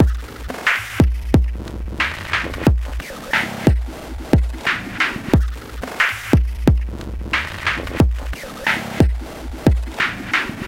lo-fi idm
beat distrutti e riassemblati , degradazioni lo-fi - destroyed and reassembled beats, lo-fi degradations